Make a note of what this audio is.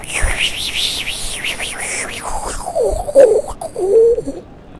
AmCS JH ME22 sjchwiewiewiesjchoegoe
Sound collected at Amsterdam Central Station as part of the Genetic Choir's Loop-Copy-Mutate project
Meaning, Central-Station, Amsterdam